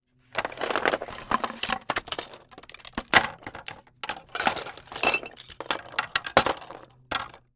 Wooden Tray Misc Objects Falling Onto It; 1
Misc object being dropped onto a wooden tray.
plank, dropping, falling, drop, misc, wooden, crashing, wood, dropped, tossing, blocks